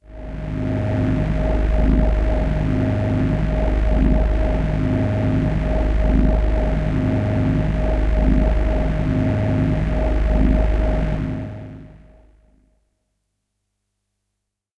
Space Pad - G#0
This is a sample from my Q Rack hardware synth. It is part of the "Q multi 012: Spacepad" sample pack. The sound is on the key in the name of the file. A space pad suitable for outer space work or other ambient locations.
electronic, pad, space, space-pad, waldorf